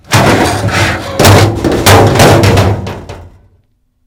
big metal side impact 3 scraping
Foley SFX produced by my me and the other members of my foley class for the jungle car chase segment of the fourth Indiana Jones film.
big, impact, metal, scraping, side